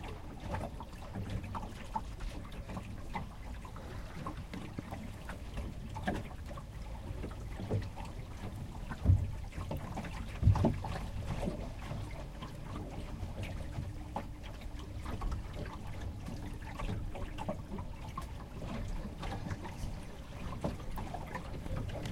sail-boat-inside
Inside a sailing boat
inside-boat-ambience, water